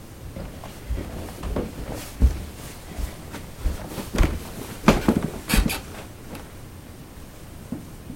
walk upstairs
A quick recording of a person walking up a double-flight of carpeted stairs. The recording was captured pretty clearly, despite my use of a cheap condenser mic for the recording.
walk, up, stairs, recording, foley, upstairs